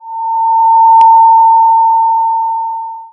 Security Scanning 03
Security Scanning
Can for example be a robot patrolling or lasers that you have to avoid in order to not get detected and / or killed!
patrol, robot